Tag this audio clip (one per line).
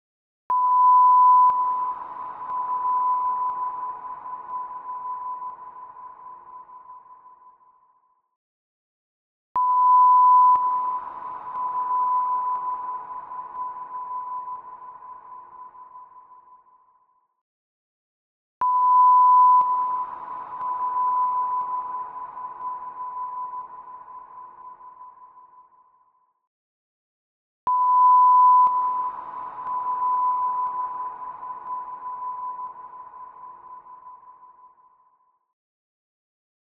1000hz
1kHz
beep
echo
electronic
ping
reverb
reverberant
reverberating
signal
sonar
submarine
underwater